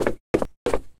Walk Up Stairs
feet, seamless, staircase, wood, footsteps, stair, walk, walking, down, wooden, looping, stairs-down, up, wooden-stairs, steps, stairs-up, stairs, loop, shoes